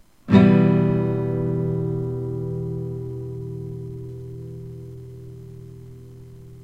used TAB: 000220(eBGDAE)
chord, em, minor